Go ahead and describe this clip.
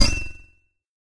An electronic percussive stab. Another short electronic sound with some
delayed bell like sound. Created with Metaphysical Function from Native
Instruments. Further edited using Cubase SX and mastered using Wavelab.